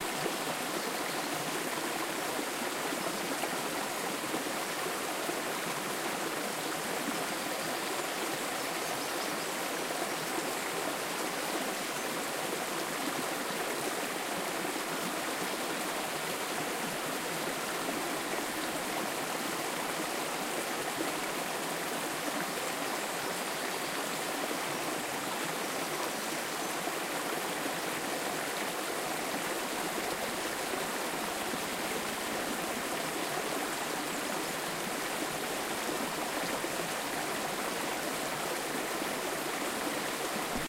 Creation date: 13 - 06 - 2017
Details of this sound:
Subject of recording:
- Object : Small waterfall
- Material : Water
Place of capture:
- Type : In forest
- Resonance : Very low
- Distance From source : 2 m / 2 yard
Recorder:
- Recorder : Tascam DR-40 V2
- Type of microphone used : Condenser microphone
- Wind Shield : Rycote DR-40MWJ
Recording parameters:
- Capture type : Stereo
- Input level: 60
Software used:
None